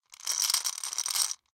Glass marbles shaken in a small Pyrex bowl. Bright, glassy, grainy sound. Close miked with Rode NT-5s in X-Y configuration. Trimmed, DC removed, and normalized to -6 dB.